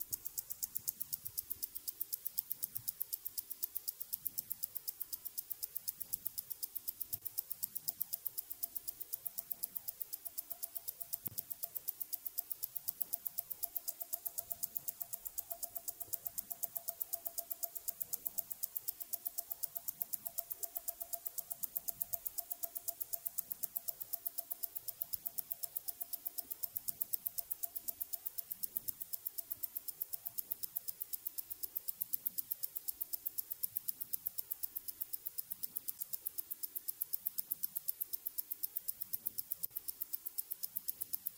Automatic Wrist Watch Ticking
My Omega Seamaster with ETA 2892 movement. Automatic watch ticking. Unfortunately it is so silent I had to boost the audio and clean it up with noise reduction and filters.
Thanks
automatic, clock, clockwork, hand, omega, second, seconds, tick, ticking, tick-tock, time, watch, wrist, wristwatch